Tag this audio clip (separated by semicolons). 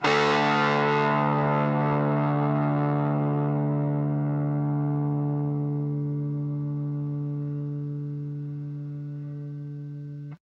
amp; chords; distortion; guitar; power-chords